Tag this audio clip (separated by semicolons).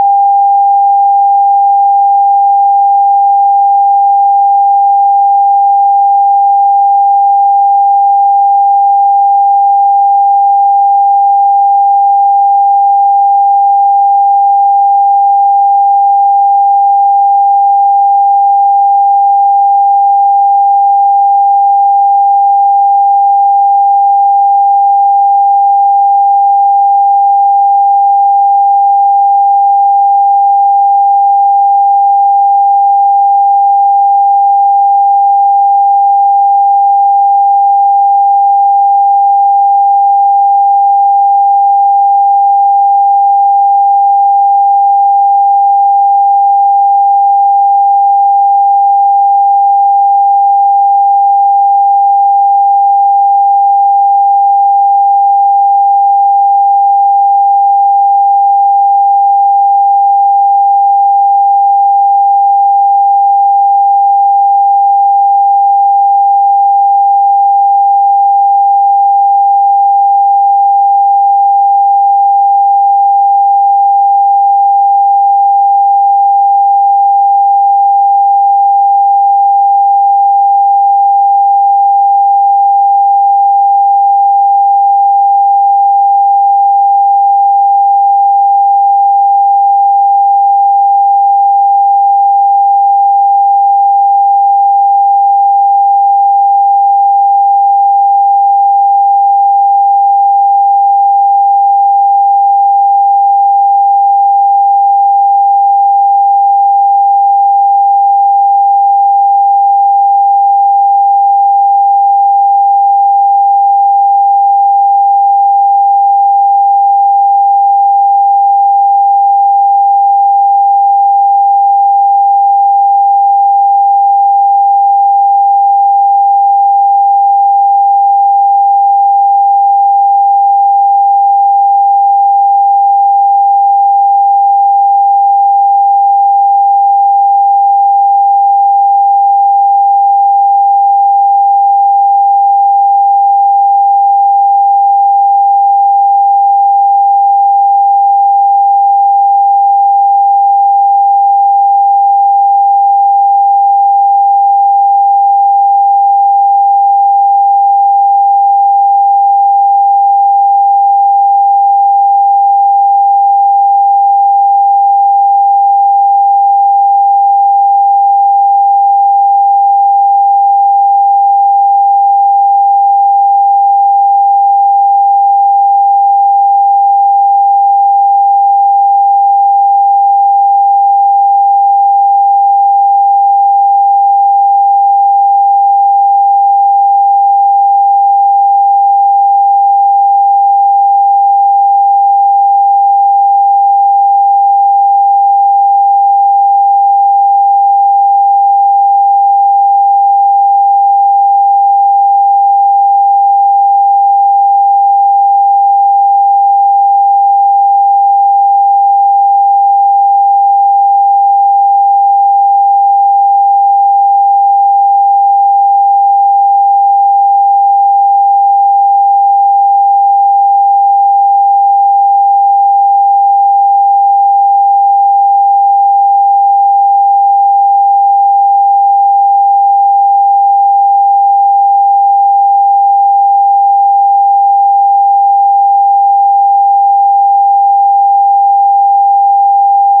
electric; sound